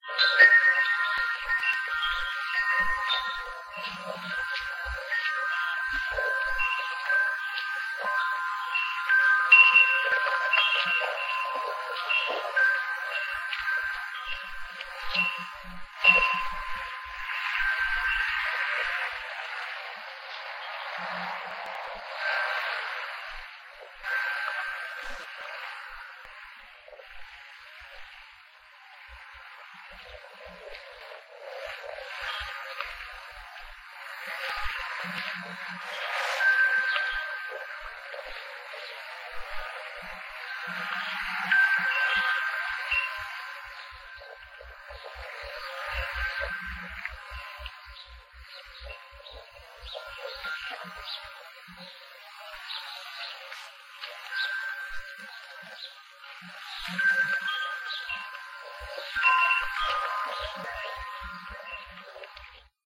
Mike's Afternoon In Suburbia - Wind Chimes Enveloped
windchimes with some birds near the end. I lost the foam head for the mic... it's kind of relaxing, I'm sure with some editing it could sound better ..
9:00am outside my neighboors house.
oriental, ambient, wind, chimes, meditation, zen